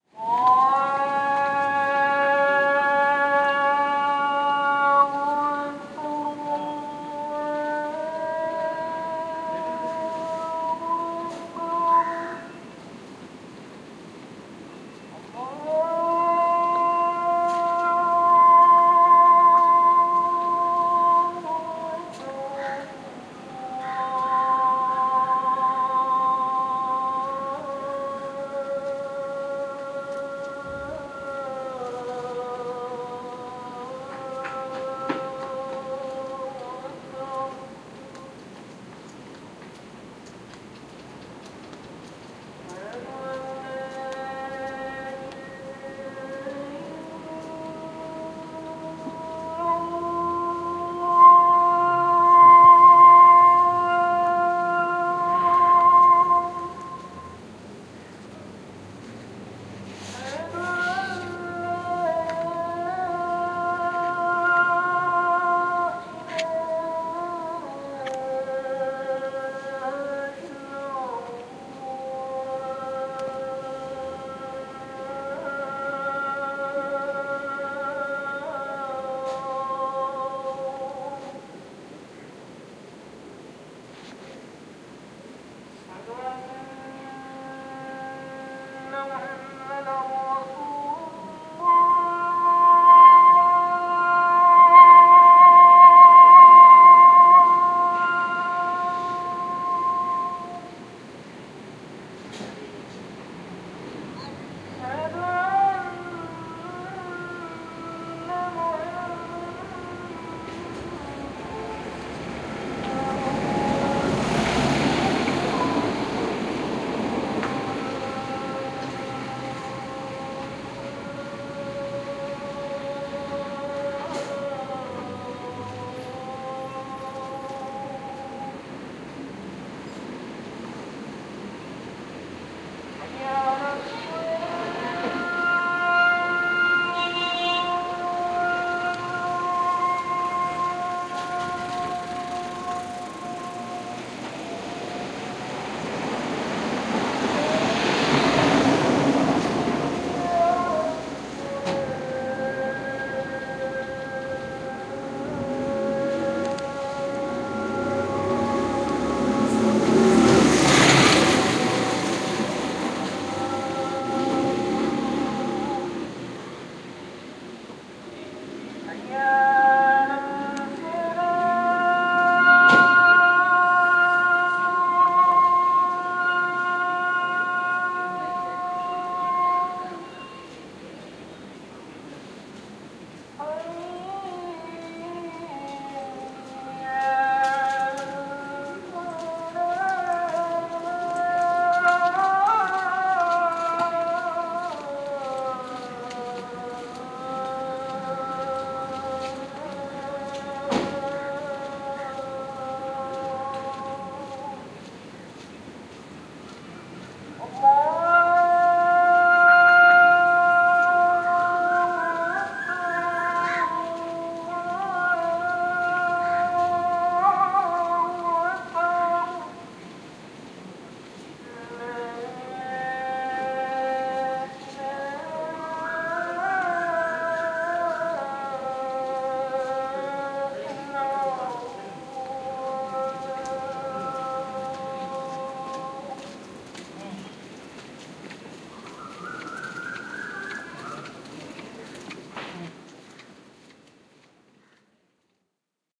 The sound of the evening call to prayer. Recorded in the Bambalapitiya area of Colombo, Sri Lanka near a mosque as people make their way to pray. The sound of people passing, crows and traffic can also be heard.